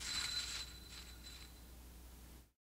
Tape Misc 1
Lo-fi tape samples at your disposal.